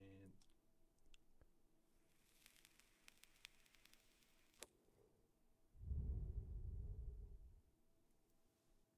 inhaling juul and exhaling smoke
exhale, juul, smoke